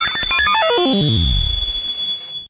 Digital glitch 055 NR
Little beep mellody.
Created using a VST instrument called NoizDumpster, by The Lower Rhythm.
Might be useful as special effects on retro style games or in glitch music an similar genres.
You can find NoizDumpster here:
lo-fi, glitch, beeping, harsh, TheLowerRhythm, beep, NoizDumpster, VST, TLR, digital, noise